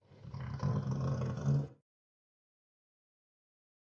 Rigid twist
Sound of something bending, but maybe you can hear something else.
Recorded by twisting skin against wooden floor, then processed on Audacity.